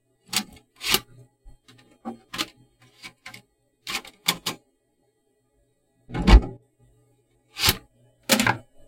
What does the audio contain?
floppy-out
in and out of a normal floppy disk in my old drive
drive, pc